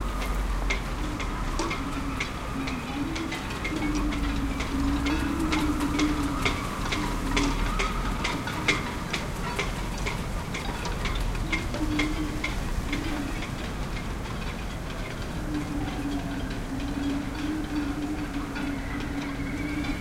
The sound of some wind remaining after storm Alex in September 2020 in a South Brittany harbour. The wind blows through the shrouds. Recorded by me on a Tascam DR-05.